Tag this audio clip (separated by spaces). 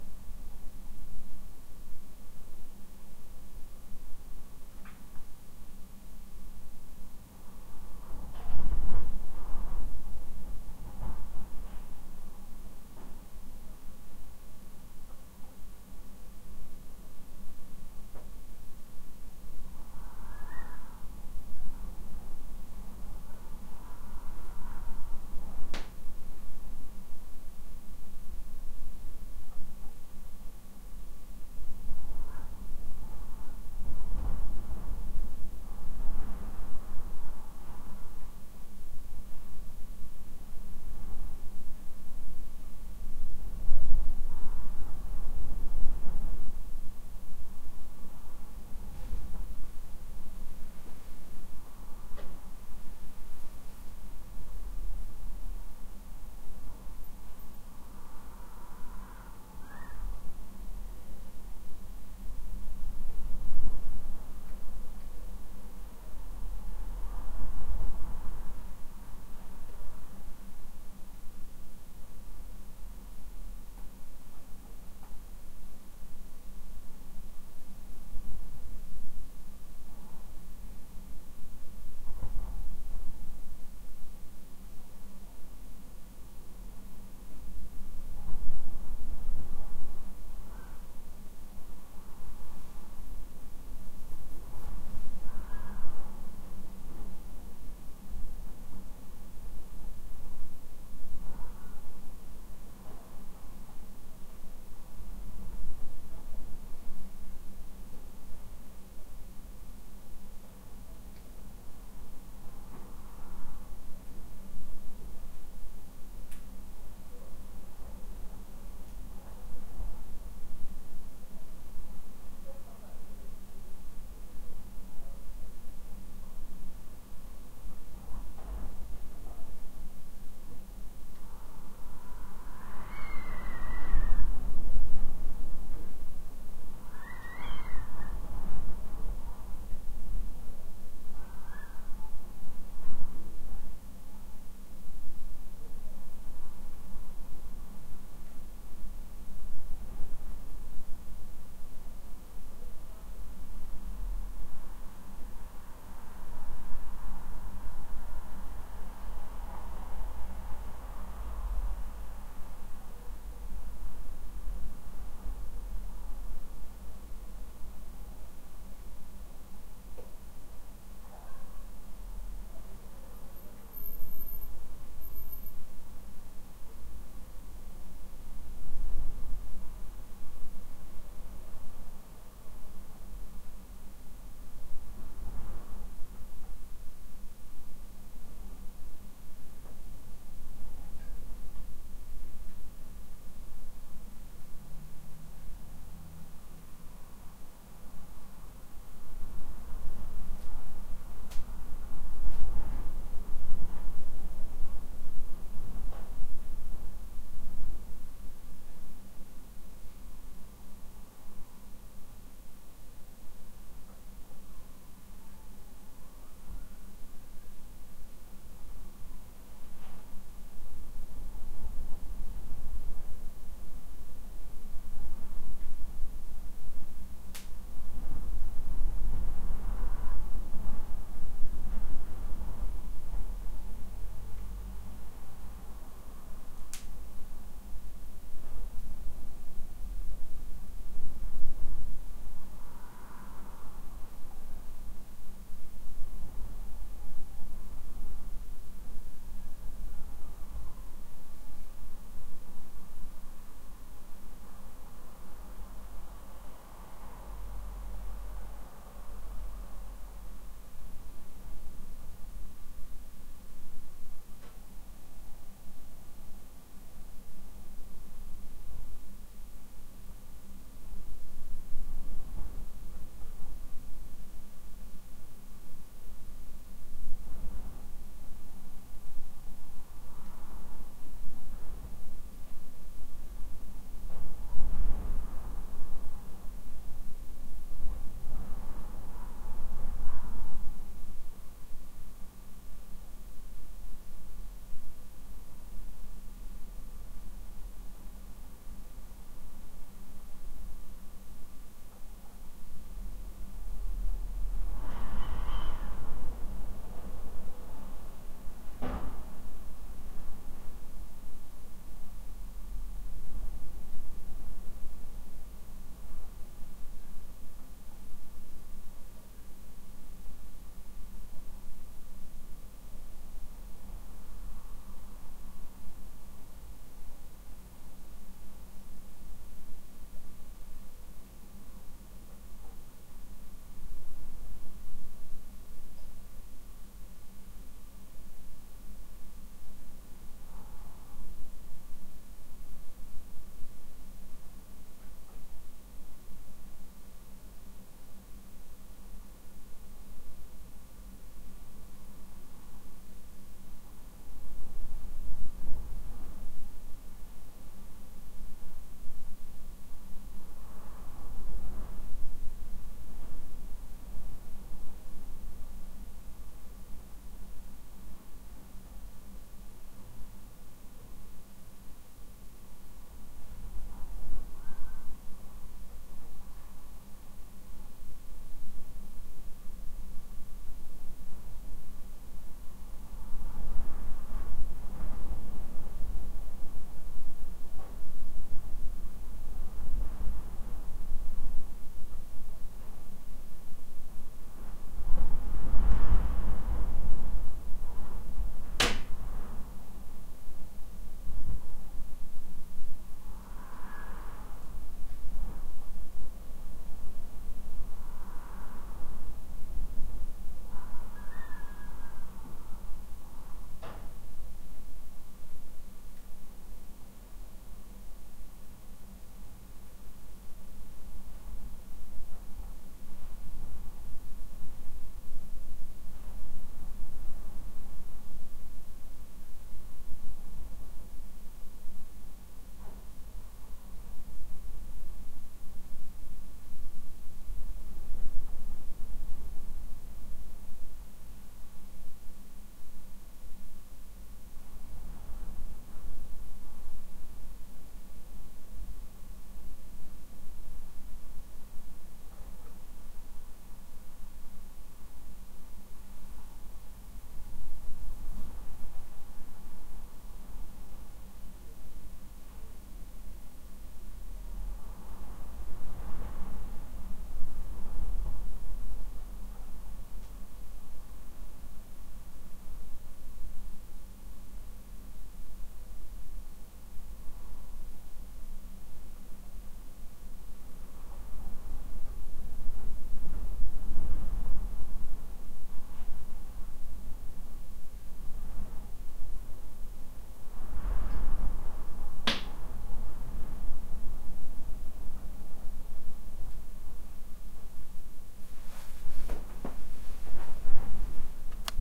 weather wind whistling gusts storm window rattling